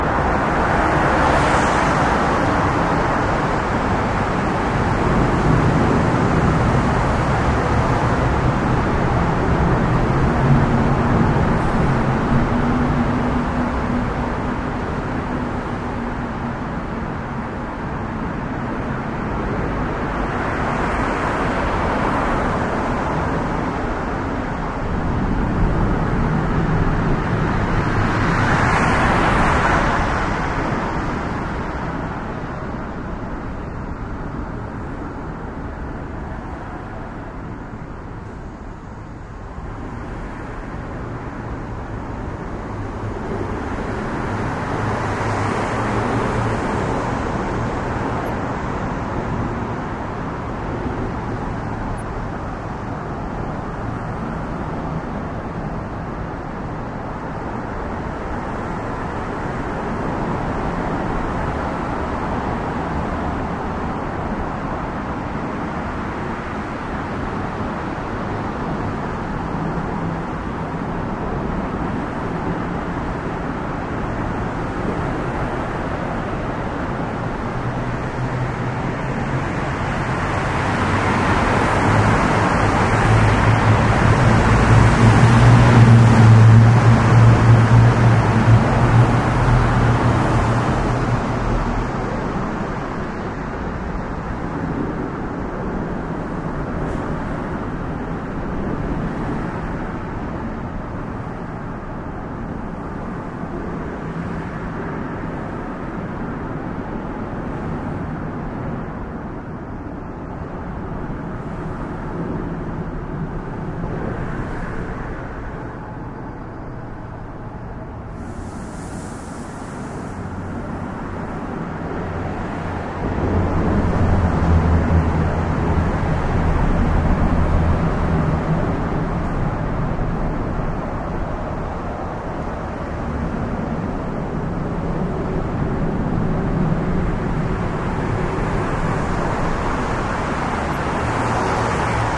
Recorded during a 12 hour work day. Taking my time passing under I-95 and recording the ominous road sounds from above and echoed traffic from inside the concrete tunnelled environment.
bus, field-recording, highway, ominous, overpass, public, traffic, transportation